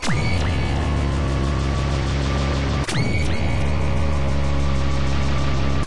inject this sting into your chorus